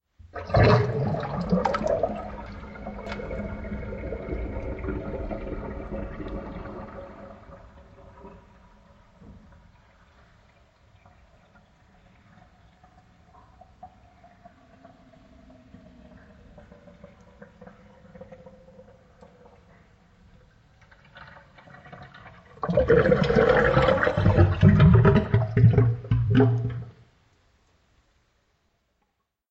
I slowed a segment of it down to get this growling gurgle sound. Enjoy!